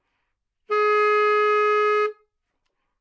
Sax Baritone - G#3
Part of the Good-sounds dataset of monophonic instrumental sounds.
instrument::sax_baritone
note::G#
octave::3
midi note::44
good-sounds-id::5271
sax, good-sounds, multisample, Gsharp3, baritone, neumann-U87, single-note